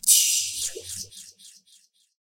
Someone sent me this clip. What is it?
magicShield block
Magic shield blcking an attack.
Dubbed and edited by me.
block, effect, magic, protection, shield, spell